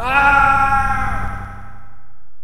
A simple short cry I use as death cry for